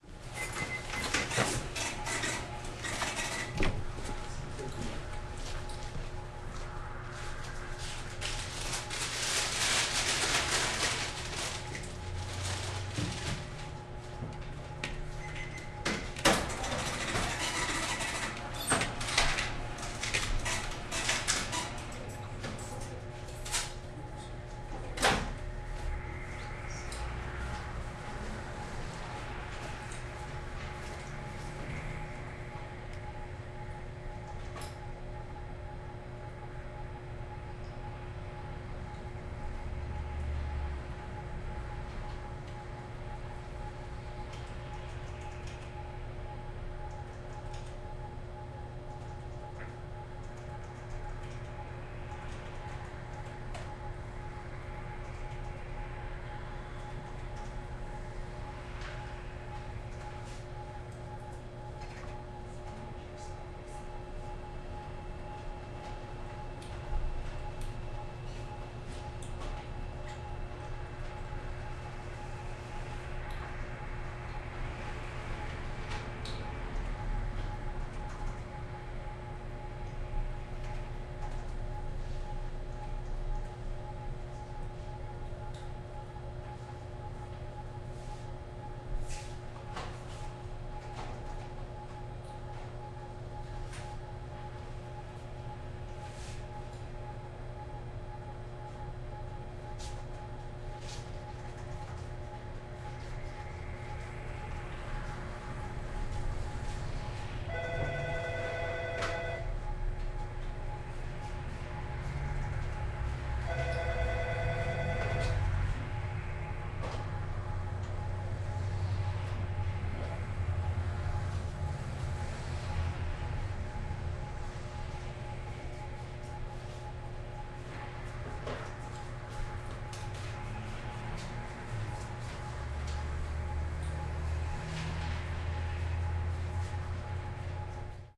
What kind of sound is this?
Small General Store